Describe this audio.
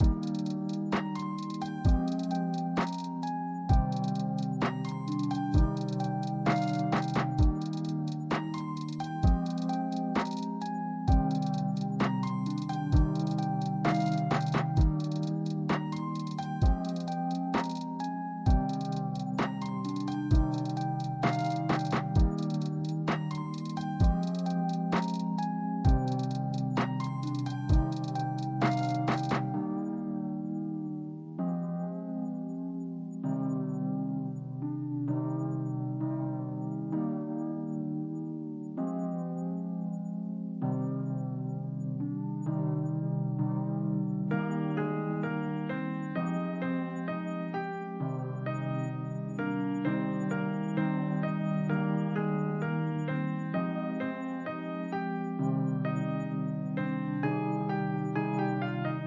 C Minor Melancholia
Sort of a sad sounding loop. I like this one quite a bit.